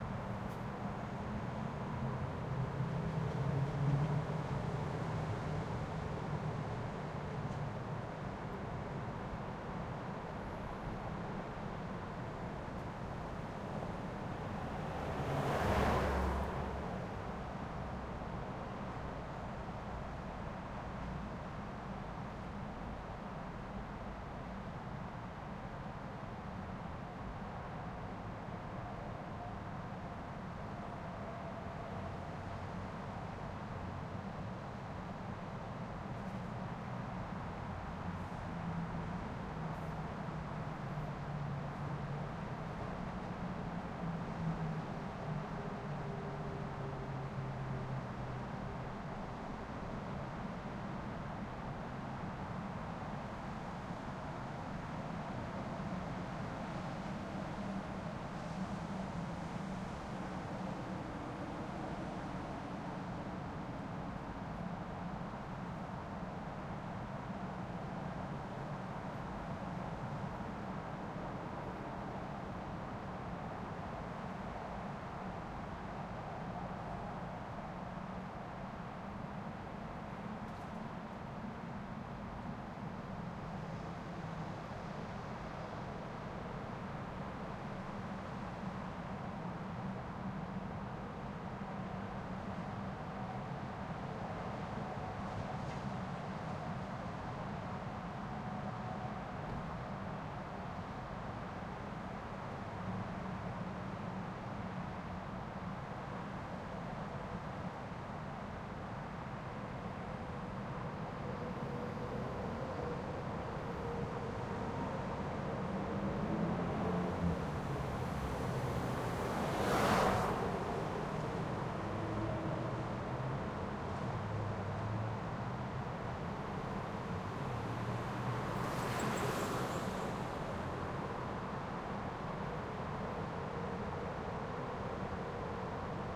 Freeway distant Ambience 405 West LA loop edlarez vsnr
Distant Ambience of 405 highway freeway West Los Angeles edlarez vsnr
405, 405-freeway, Ambience, cars, distant, freeway, highway, LA, Los-Angeles, traffic, west-LA